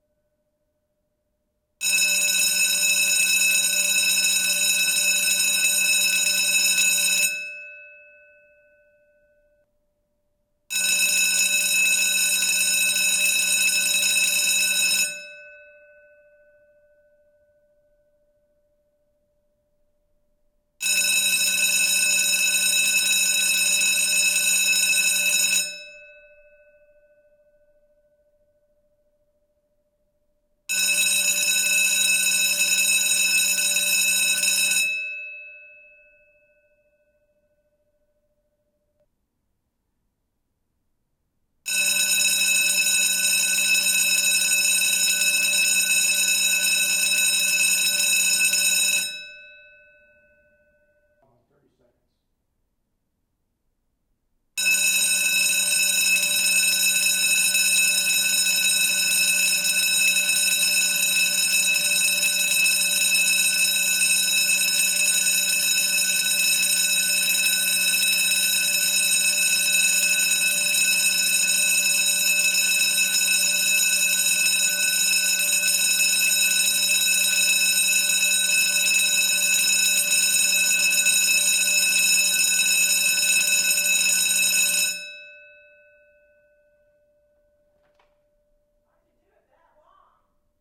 School Bell - Fire Bell
Solenoid Striker Bell used as a fire bell or school bell. Recorded with NTG4+ and Sony PCM-D50 Multiple Rings of various duration
Striker, Edwards-Adaptabel, Solenoid, 6-inch-diameter, School-Bell, Fire-Bell